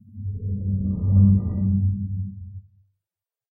cannon-gift
A hollow sound of wind blowing across the barrel of your cannon.
From my short, free, artistic monster game.
I used the Olympus VN-541PC to record.
blow, bottle, empty, hollow, hoot, jug, low, pipe, pottery, wind